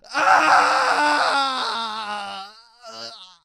Zombie related screams
scared, screaming, zombies